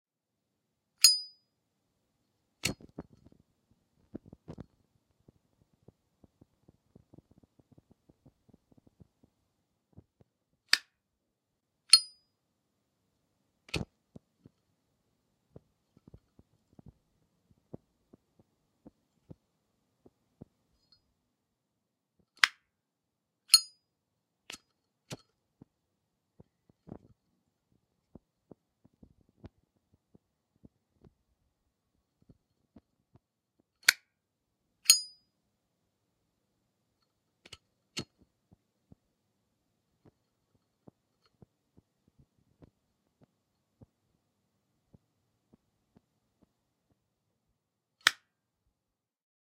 Zippo lighter open, light, close x4
Up close recording of a Zippo brand lighter opening, lighting, burning for a few seconds and then closing four times.
Recorded on Marantz PMD661.